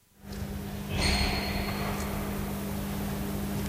This is high glitch that was captured by mistake.
noise, high, Glitch